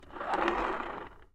I found a busted-up See-N-Say in a thrift shop in LA. The trigger doesn't work, but the arrow spins just fine and makes a weird sound. Here are a bunch of them!
recorded on 28 July 2010 with a Zoom H4. No processing, no EQ, no nothing!